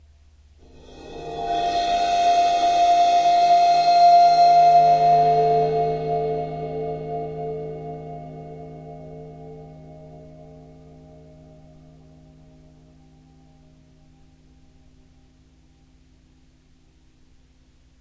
Cymbal Swell 006
bowed cymbal swells
rare 18" Zildjian EAK crash ride
clips are cut from track with no fade-in/out. July 21St 2015 high noon in NYC during very hot-feeling 88º with high low-level ozone and abusive humidity of 74%.
soundscape, ambient, overtones, bowed-cymbal, ambiance, atmosphere